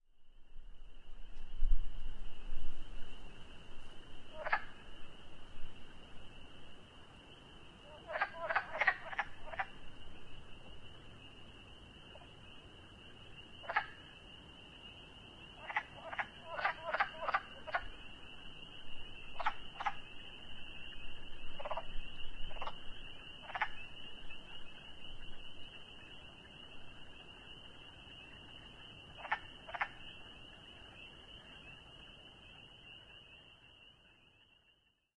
Wood Frog(s) (Rana sylvatica) calling, recorded in Whitehill, Nova Scotia, April 2010.
calling, frogs, wood